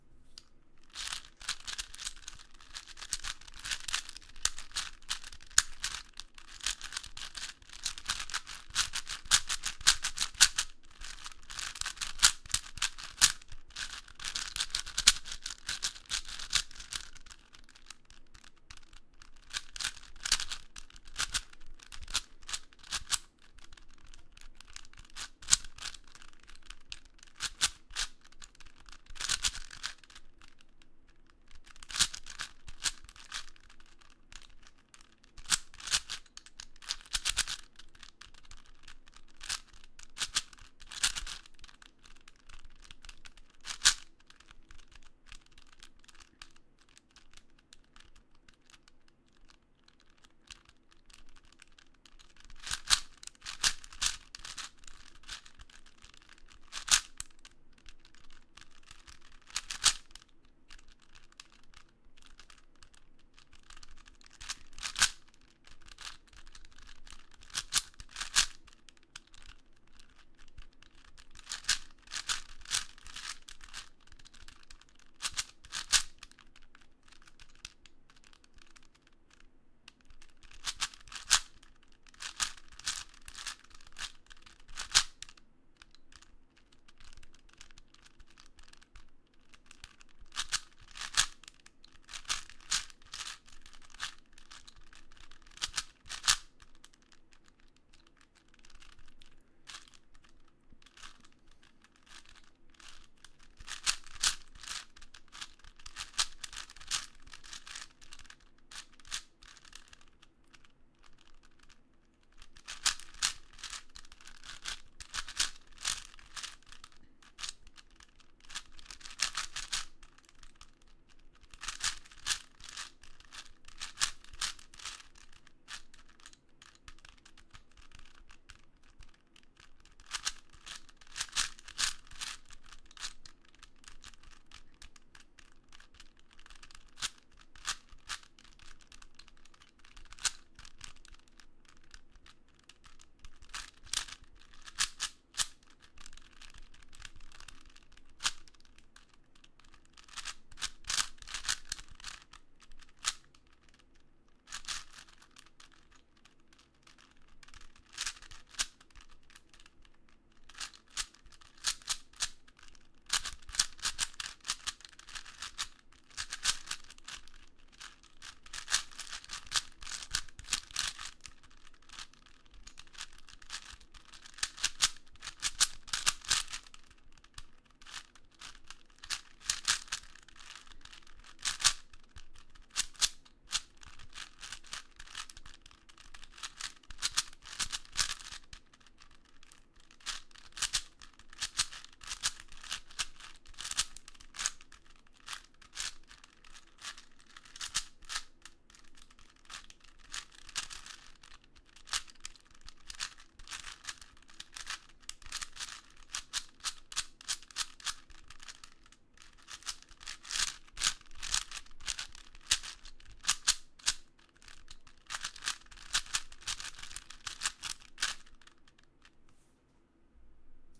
Me doing a quick solve of a 4x4x4 Cube
Crunch, Puzzel, Rubiks